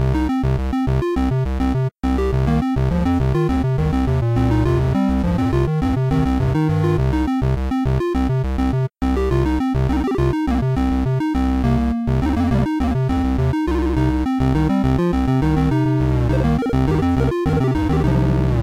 Random Chiptune loop
Thank you for the effort.